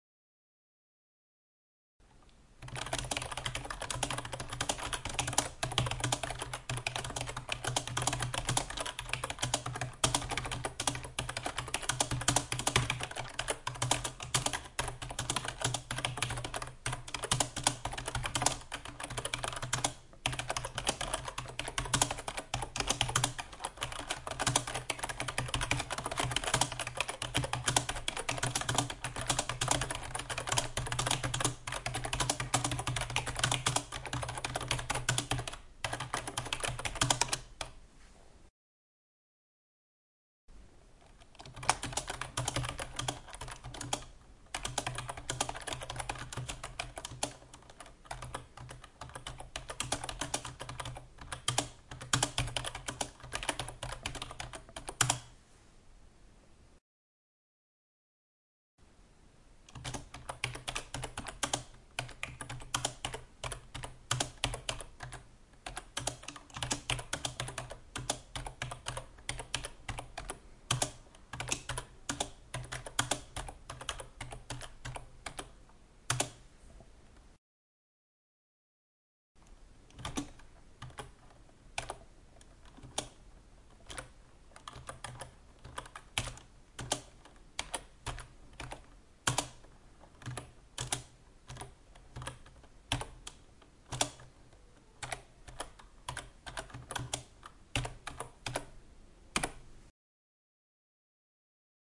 06 keyboard, typing
classic computer keyboard typing
computer, CZ, Czech, keyboard, office, Panska, typing